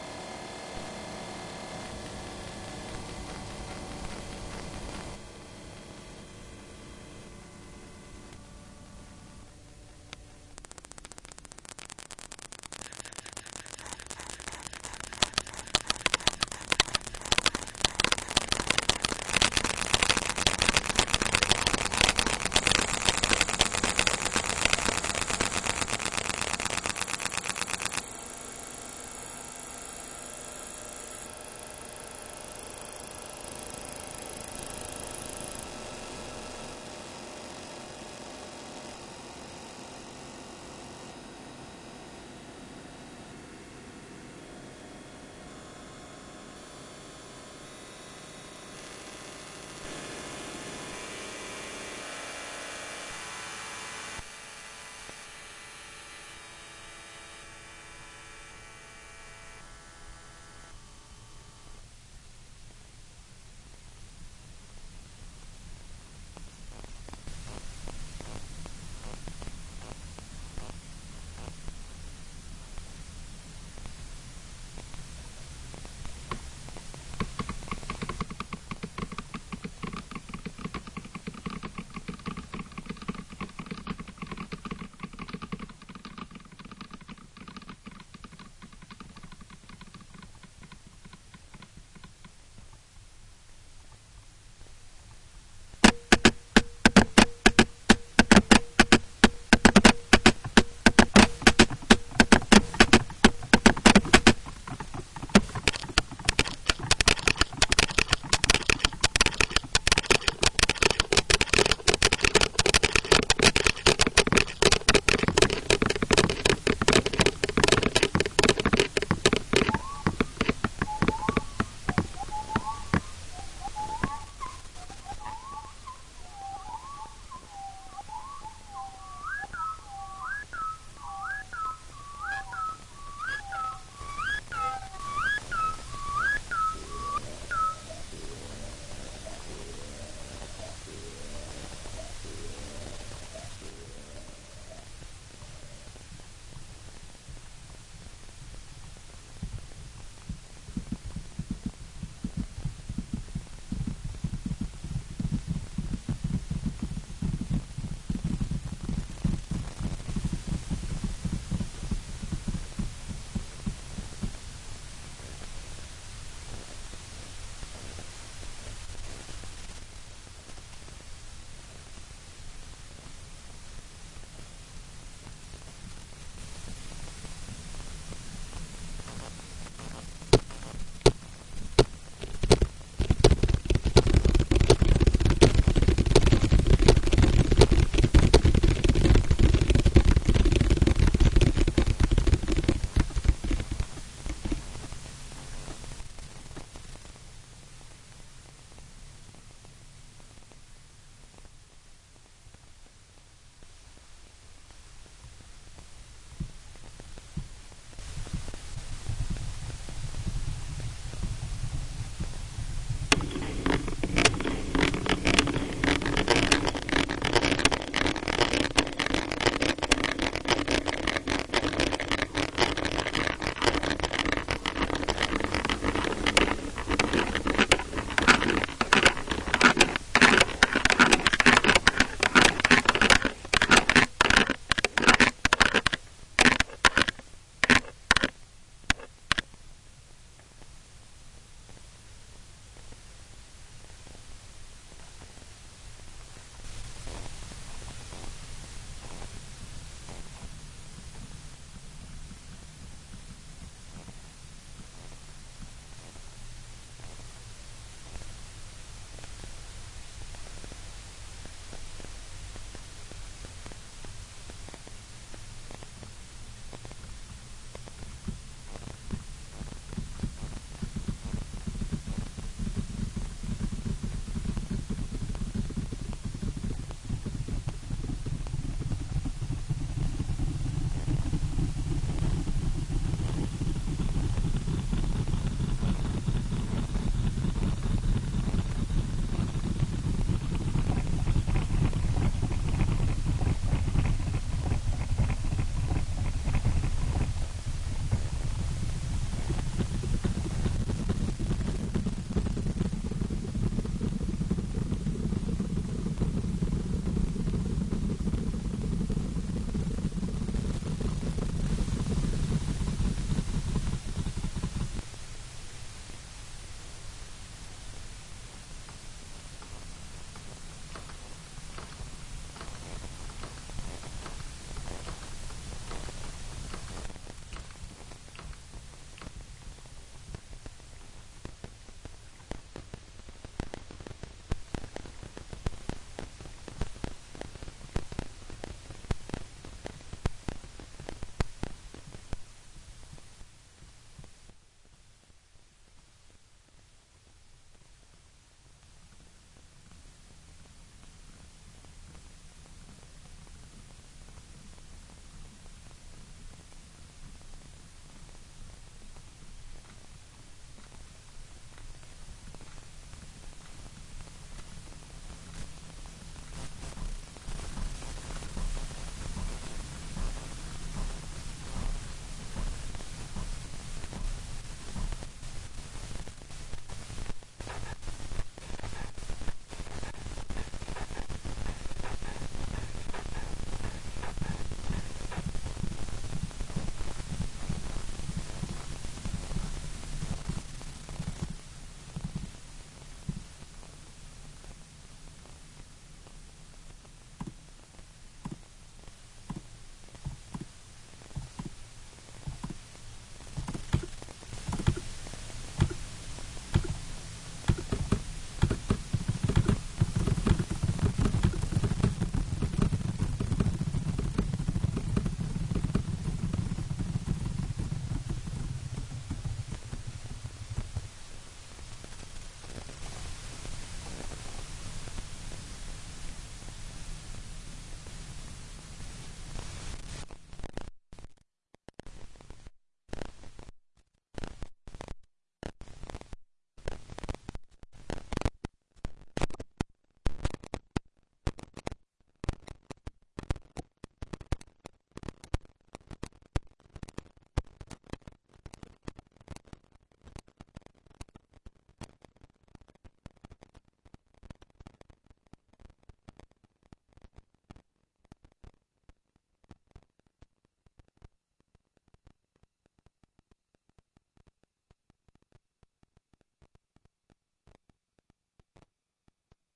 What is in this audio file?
Recorded from laptop running autocrap to PC, internal sound card noise and built in microphones.
synth, buffer, noise, sound